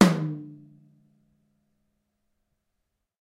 tom - Gretsch Cat Maple 8 - 1
Gretsch Catalina Maple tom. 8 inch.
8, drums, gretsch, tom